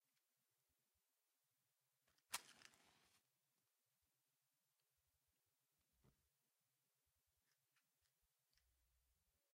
enscendido de un fósforo
fuego, cerillas, f, sforo